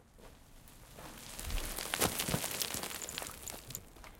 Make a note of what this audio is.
Gravel and pebbles faling and rolling from big heap.
Very stereo. Few footsteps and gasps in the background.